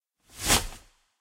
VS Short Whoosh 7
Short Transition Whoosh. Made in Ableton Live 10, sampler with doppler effect.
effect, fast, foley, fx, game, sfx, short, sound, swish, swoosh, transition, video, whoosh, woosh